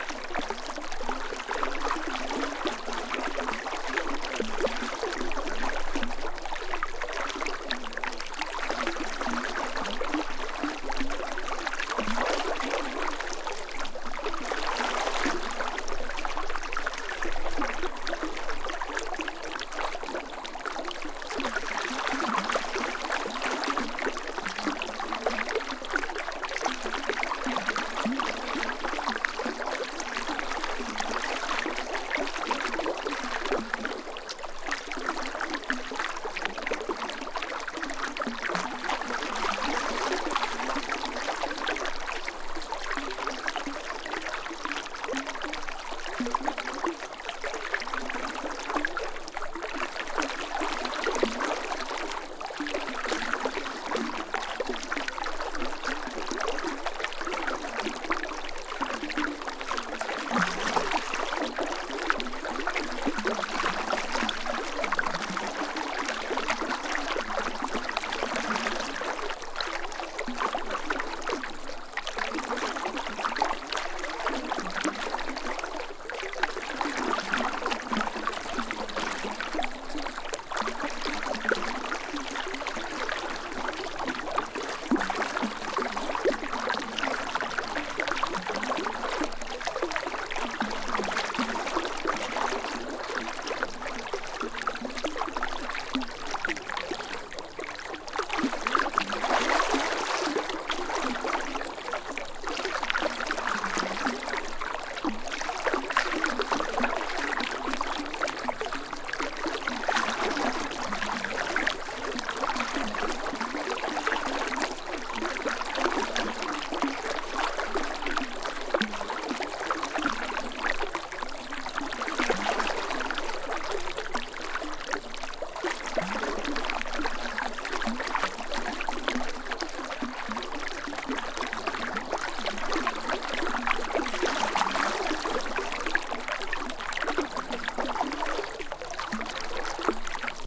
a log in a river
A fast-moving stream flows around a log moving up and down in the water.
Recorded with an AT4021 mic into a modified Marantz PMD661.
wilderness, water, flow, liquid, gurgle, ambient, outside, field-recording, nature, river, stream